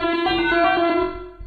Mechanical Sequence 002
This time the input from the cheap webmic is put through a gate and then reverb before being fed into SlickSlack (a different audio triggered synth by RunBeerRun), and then subject to Live's own bit and samplerate reduction effect and from there fed to DtBlkFx and delay.
At this point the signal is split and is sent both to the sound output and also fed back onto SLickSlack.
Ringing, pinging, spectraly modified pingpong sounds result... Sometimes little mellodies.